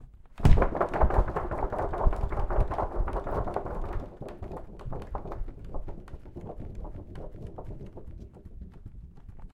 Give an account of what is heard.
Sound for a spaceship or Prison.
abstract, space